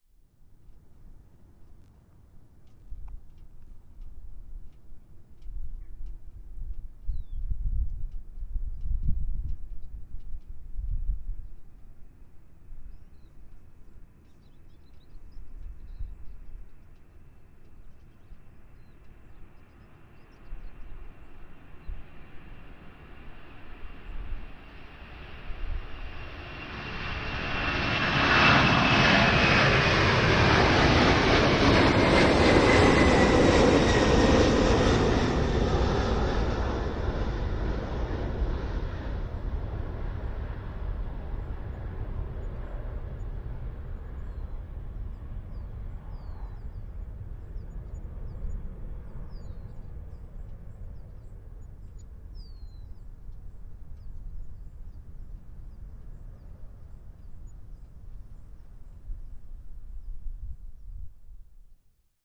MD-11 takeoff
Sound of a FedEx McDonnell Douglas MD-11 taking off.
takeoff, aircraft, MD-11, runway, take-off, airplane